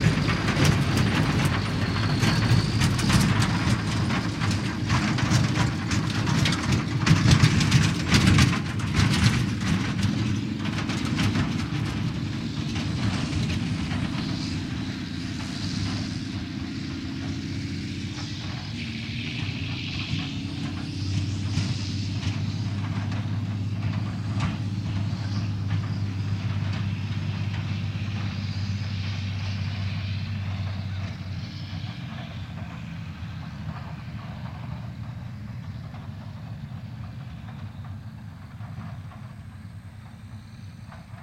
Truck Rattle FF657
truck, truck rattle